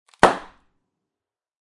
bang-01-clean
Bang made of a plastic transport bag that is squeezed until is bursts